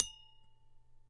Glass A pp
This sample is part of an instrument. This means the samples can easily be imported into your sampler of choice.This is an idiophone, a wine glass stroke with a iron bark (type of eucalypt)clap stick. This is only one glass, pitching was done by adding water,this also creates a pitch modulating effect, especially on lower FFnotes. Recording was done with Studio Projects B-1 condenser microphone. No processing.
instrument, pianissimo, struck, a